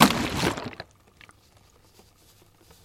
found canister 2
Shaking a canister containing smoke machine liquid
liquid, canister, foundsound, shake, container, water